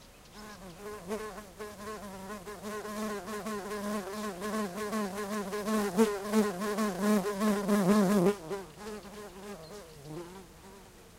20080226.bee.rbd.03
a large solitary bee moving around the mic, some birds sing in background. Shure WL183 capsules into Fel preamp, Edirol R09 recorder
bee, birds, buzz, field-recording, south-spain, spring